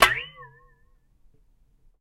Recorded the pitchy sound the top of a pot made when being submerged and taken out of water. Recorded on my Zoom H1 with no processing.